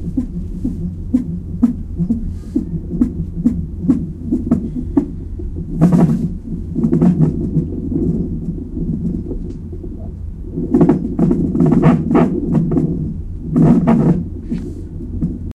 baby heartbeat 0414
Sounds leading up to the birth of a baby, fetal heart microphone recorded with DS-40.